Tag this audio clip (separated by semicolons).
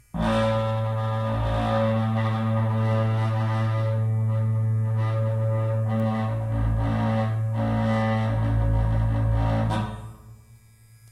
Repeating; electric; engine; metal; metallic; motor; processing; shaver; tank